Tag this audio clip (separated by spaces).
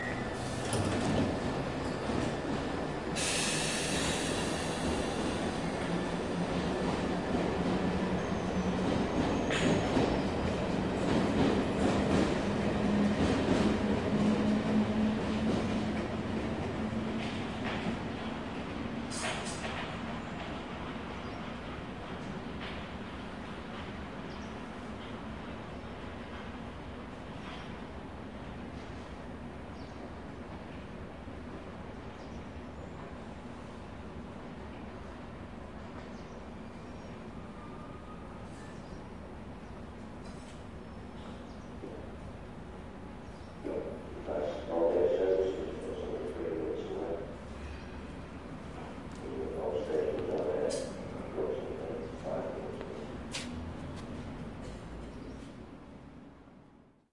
Air latform Open Station Tube Underground